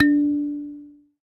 SanzAnais 62 D3 doux crt b
a sanza (or kalimba) multisampled